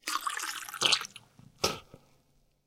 delphiz MOUTH COFFEE SPLASH LOOP 1 #090
Spitting out of mouth cold coffee. Sounds like a funny splash loop
splash, coffee, spit, bpm90, loop, fx, noise, human, voice, mouth, spitting